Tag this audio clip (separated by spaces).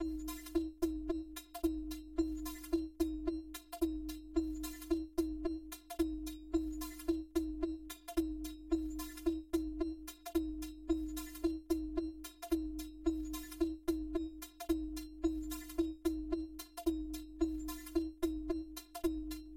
clave claves experimental funky hip-hop loop peculiar rap surreal